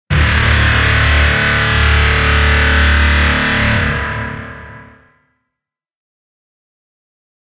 Capital Class Signature Detected (Reverb)
Here's another horn I made. It was stupid simple to make, however I liked the sound of it so I thought I'd upload it. I was inspired the capital ship horns in Elite:Dangerous that sound off whenever they drop in or out of hyperspace. This one is with reverberation.